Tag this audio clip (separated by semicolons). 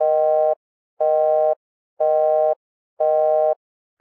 busy; digital; synthesized; telephone; tone